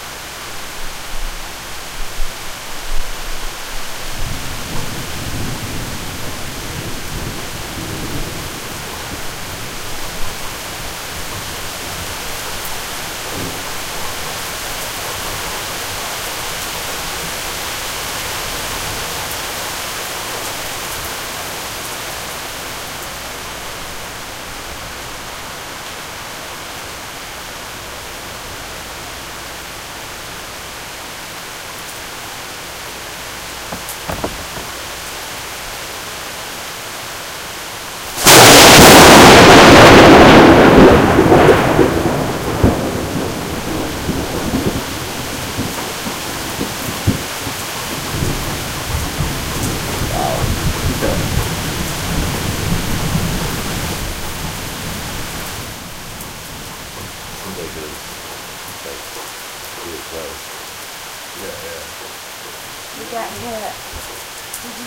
a lightning strike right behind my uncle's house that took out the power
electricity, field-recording, lightning, loud, nature, rain, raining, rainstorm, rumble, storm, thunder, thunder-storm, thunderstorm, weather, wind